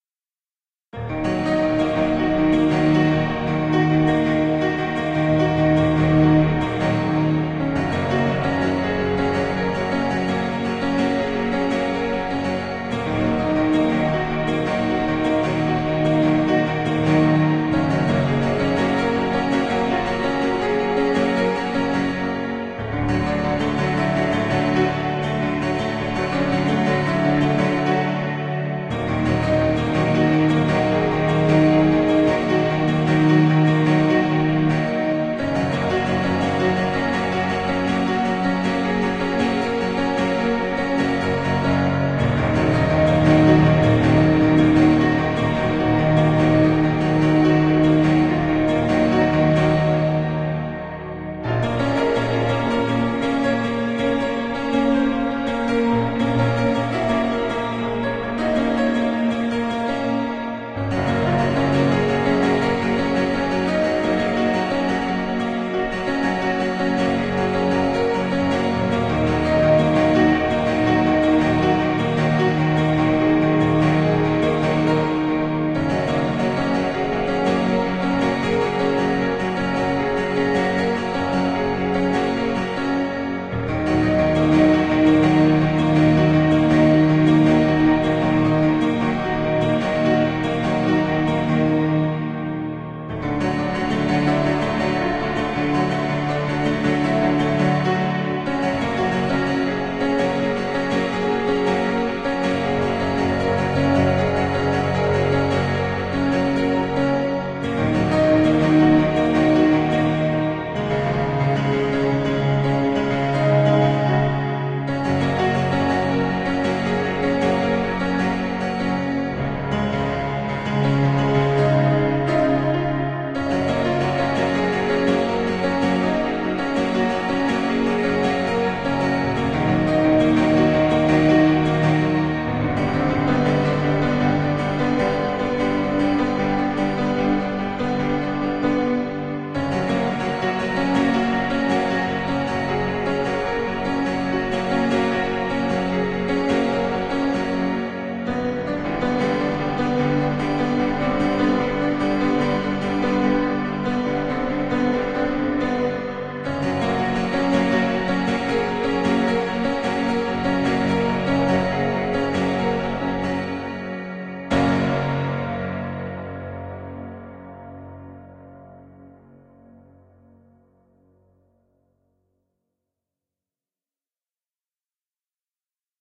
best classical music done on keyboard by kris klavenes
hope u like it did it on the keyboard :)